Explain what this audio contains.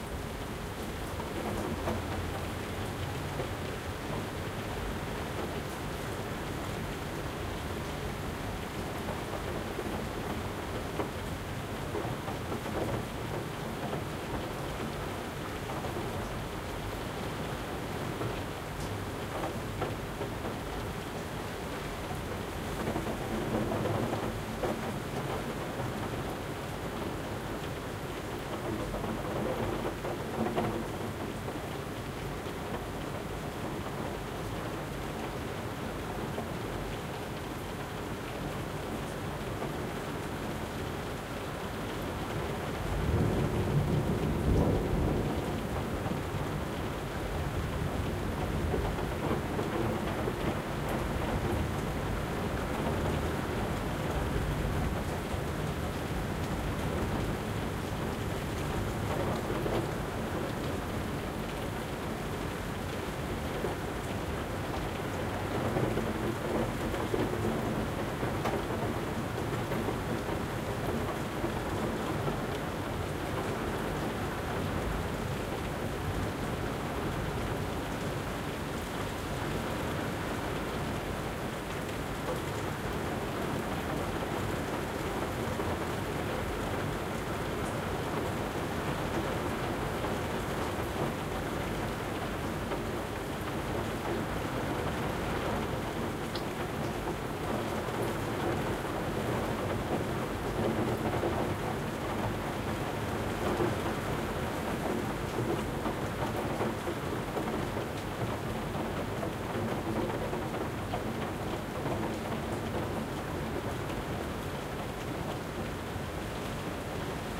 Rain on tin roof, dripping onto tin window sills
Pattering of rain on a metal roof, with water dripping onto the window sills. The windows are slightly open, so the noise isn't completely muted. Outside, rain falls onto concrete and asphalt
pattering, rain